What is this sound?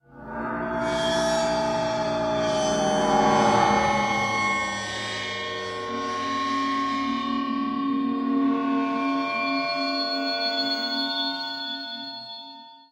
cymbal resonance 8
cymbal processed samples remix
cymbal, percussion, transformation